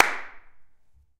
Pack of 17 handclaps. In full stereo.